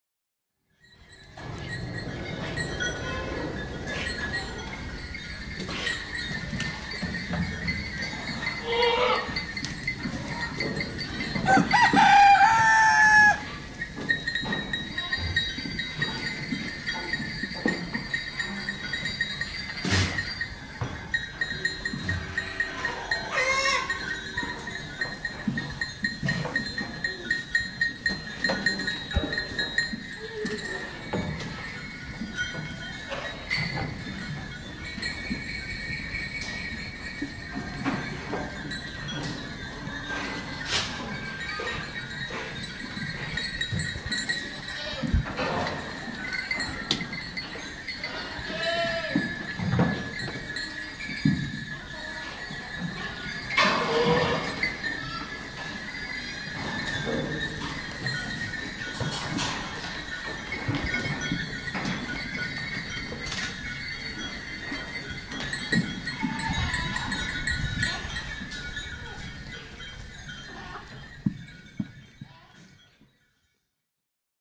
Goat farm in Barlovento, La Palma, Canary Islands
This is a large shed housing a hundred goats owned by a local cheese producer. It's an autochthonous goat breed called 'cabra palmera' (goat from La Palma island). Listen to their bells. You can also hear them bleat, even munch some straw if you pay attention... alongside with a chatty rooster.
Recorded with a Sennheiser cardioid dynamic mic onto a Sony PCM-D50.
bell, bells, bleat, cabra, canarias, canary, cattle, cheese, countryside, farm, goat, goats, island, islands, islas, islas-canarias, la-palma, palmera, rooster, spain